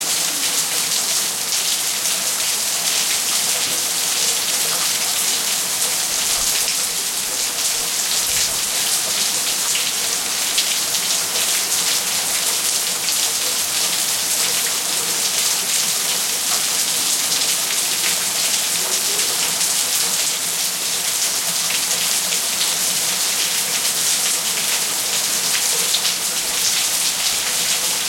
I used a Sony Handy cam HDR-SR12. Somewhere in Greece, in south Peloponnese.
field-recording, rain